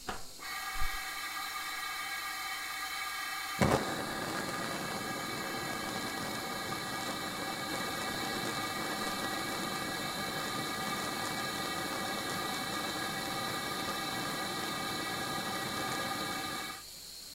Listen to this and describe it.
gas stove 2
Mono recording of a gas stove burning.
Low hissing sound from the gas flowing with a crackling sound after the gas ignites.
stove, crackling, hiss, kitchen, gas, noise, burning